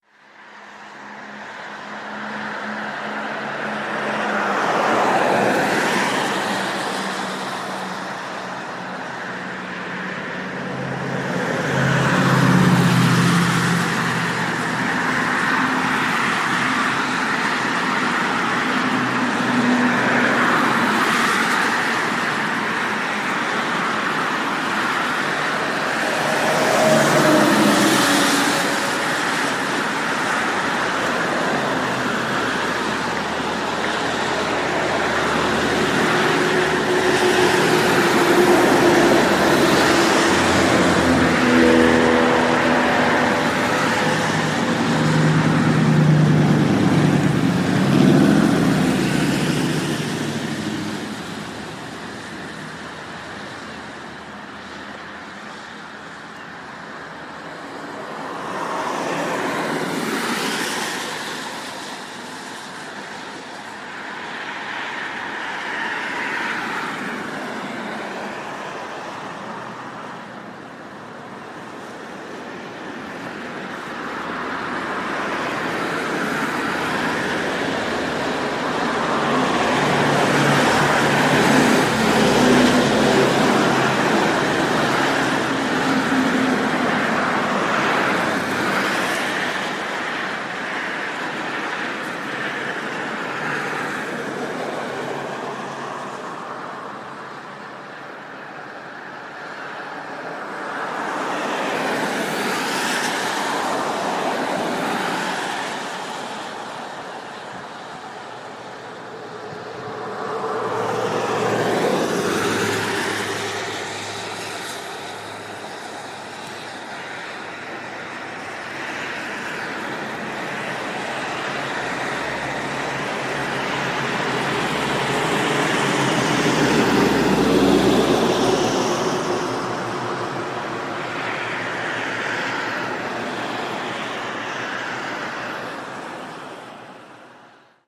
Road Noise 2
A busy highway in a city